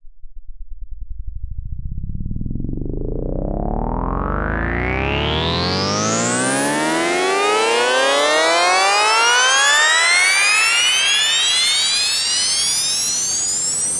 Riser Pitched 06
Riser made with Massive in Reaper. Eight bars long.